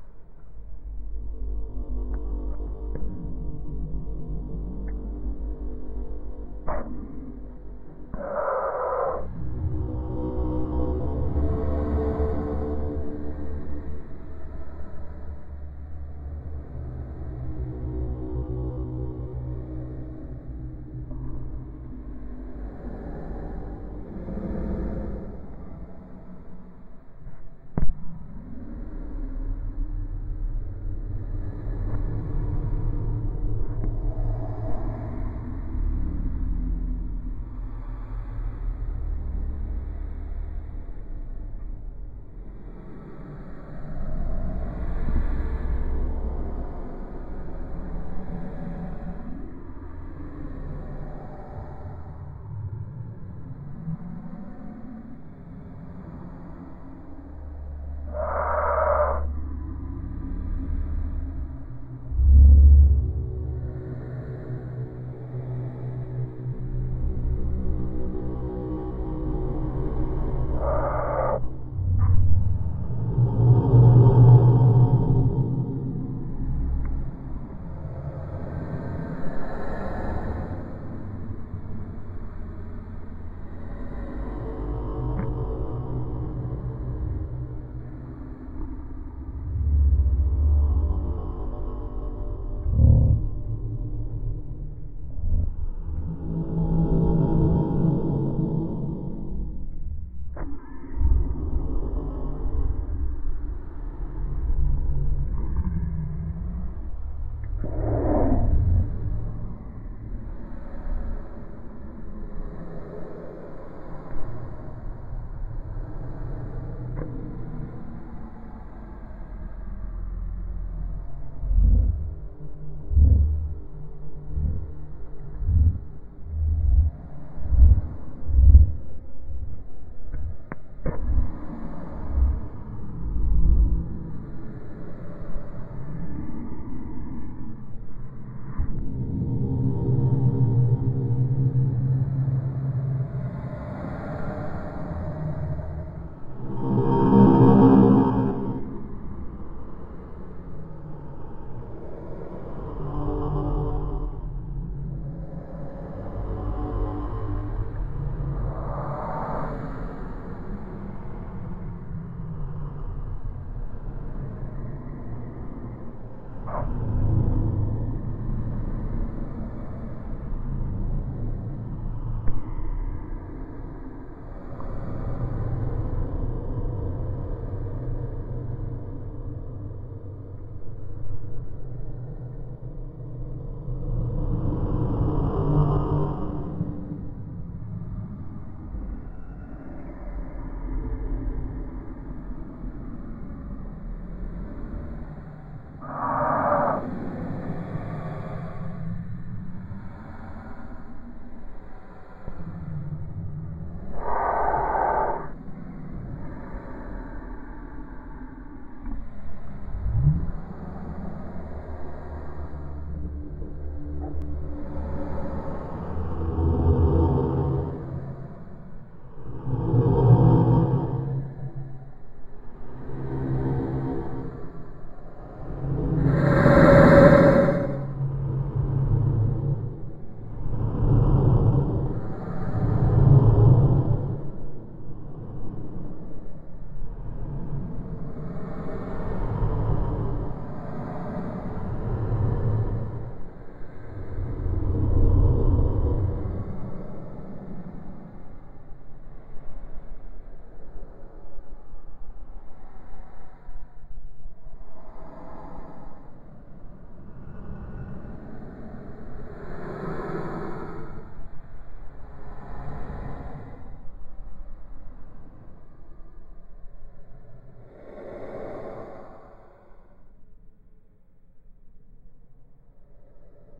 Drone sounds that are Intense and scary. Slow and breathy make it a true scary sounds.